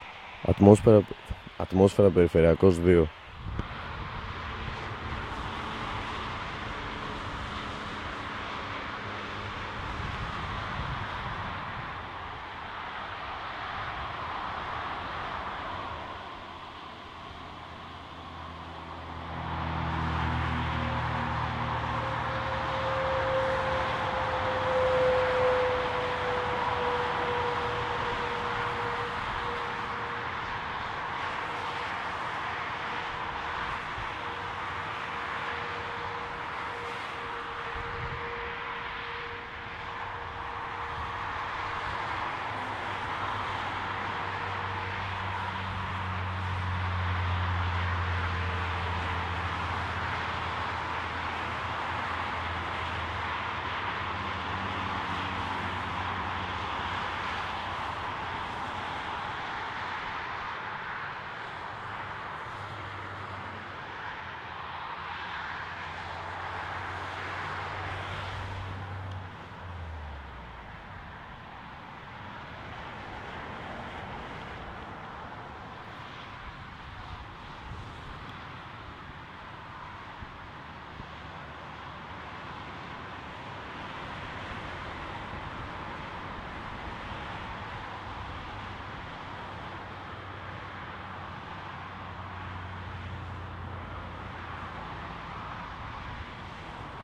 ringroad-traffic
Highway , traffic , cars, atmosphere
atmosphere, highway, Street, Traffic, Urban